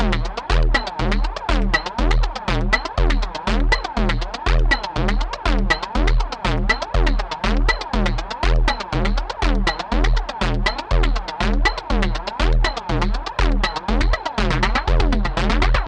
Nero Loop 5 - 120bpm
120bpm, Distorted, Loop, Nero, Percussion